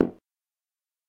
Tuba Percussion - Kick Drum
Kick drum made by banging on a tuba. Made as part of the Disquiet Junto 0345, Sample Time.
percussion, drums, tuba, percussive